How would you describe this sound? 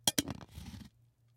Lid On Martini Shaker FF299
Twisting lid onto martini shaker